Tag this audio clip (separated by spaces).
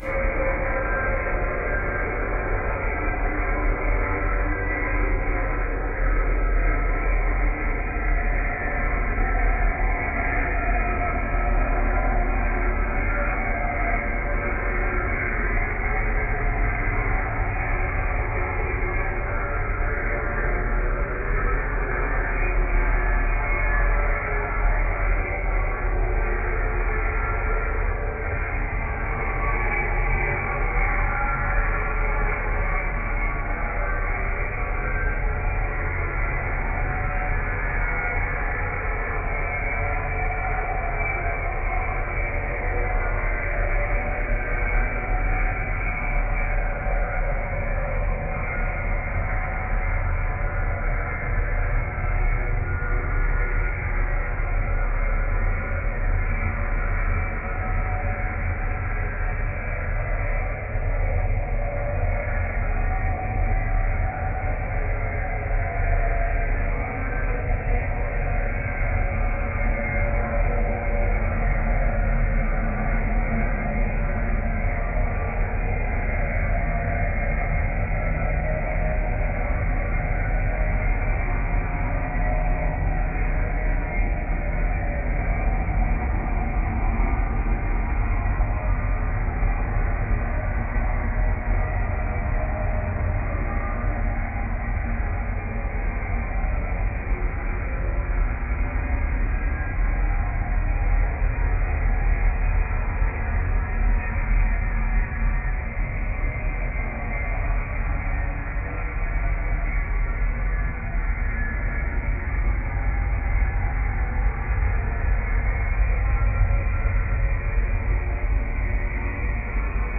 moaning distant voices pits